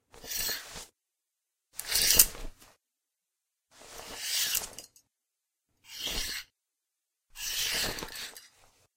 Opening and closing cheap motel curtains.
window, motel, hotel, drapes, cheap, curtains, room